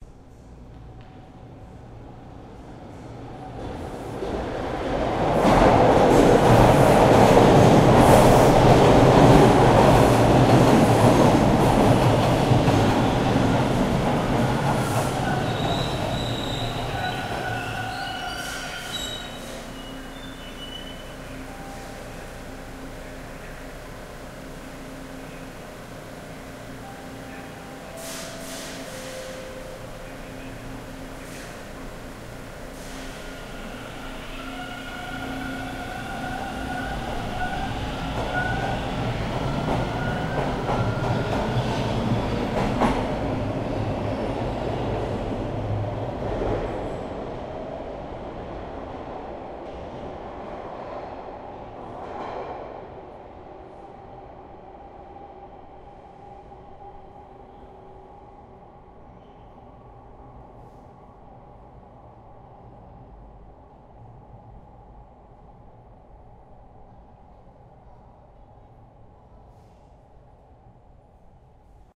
subway train enters exits station 2
Subway train enters - exits station.
enters, metro, station, subway, train